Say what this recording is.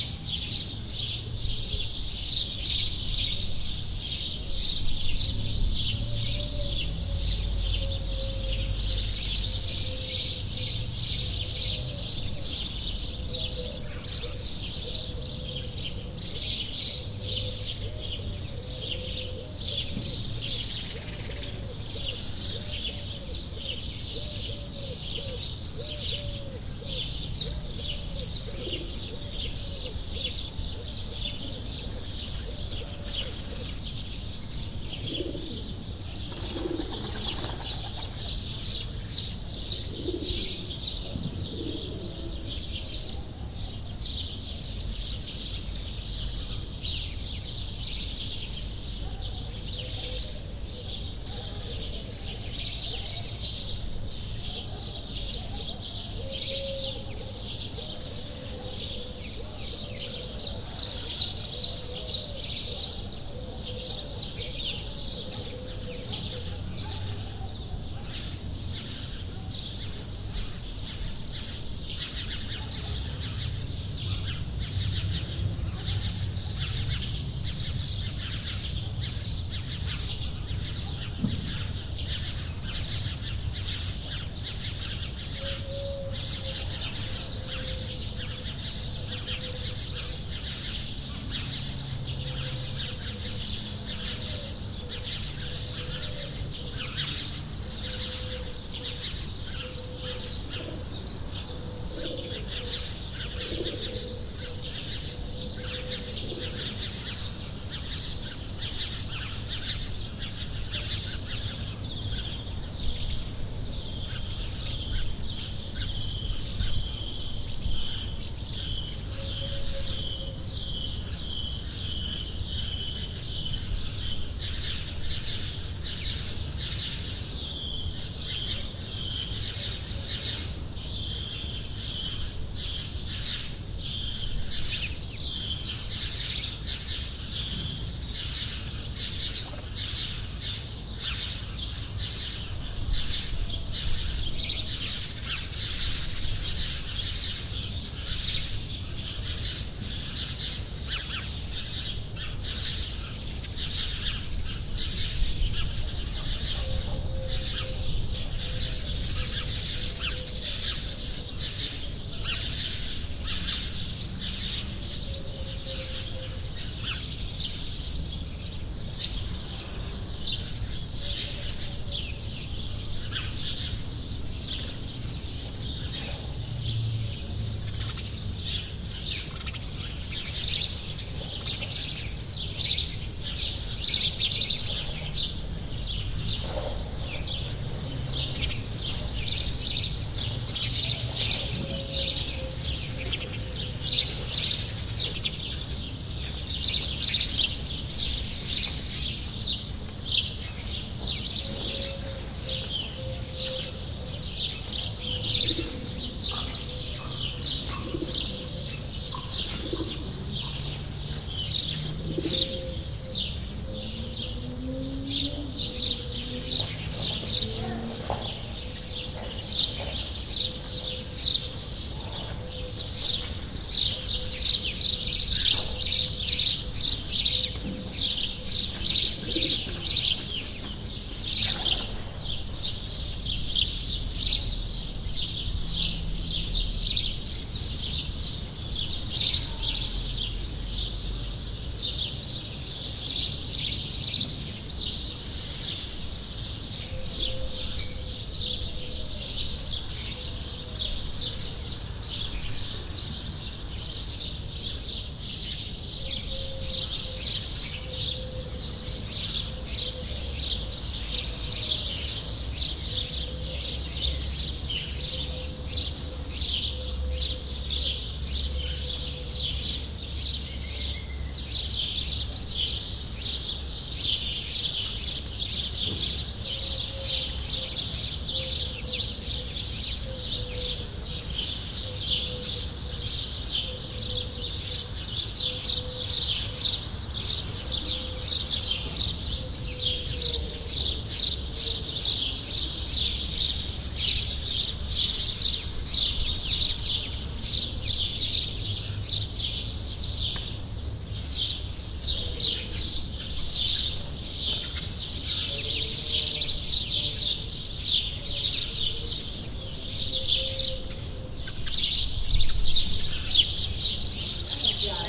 bird ambiance 3
field recording with lots of birds @ a village in Spain.
3rd recording in a group of seven.